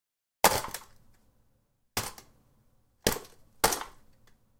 #5 Scrap Stab
ting, metal, iron, shiny, clang, steel, metallic